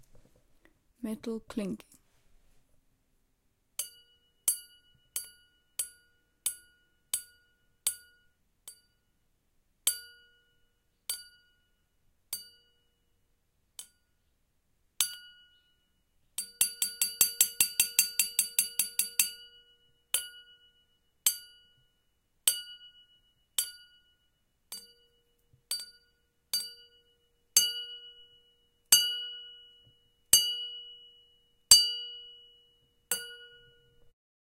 A pen hitting a metal lamp recorded, creating a metal clinking sound. Recorded with a Zoom H6 with an XY capsule.